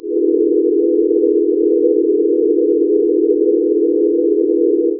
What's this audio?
Originally the sound of the burners of a gas water heater, highly modified using Audacity.
digital, efx, electronic, noise, sfx